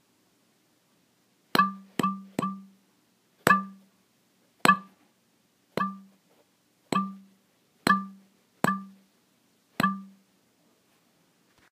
Cartoon Plug
Searched everywhere for this sound and was astonished not to find it. Very basic slap of the bare palm over the opening of an empty glass bottle, while holding the bottle in the other hand.
I don't have good equipment or environment, but I figured you all could use it anyway if there's nothing else out there.
Recorded with iPhone 4S built-in mic.
hit, pling, glass, plop, bottle, bop, smack, hand, palm, empty, glass-bottle, plug, cartoon, slap, pop